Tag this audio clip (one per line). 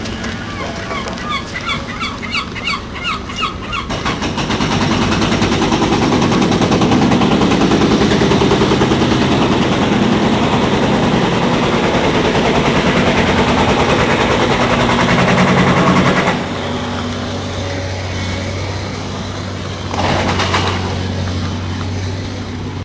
city
noise
urban
work
construction
loud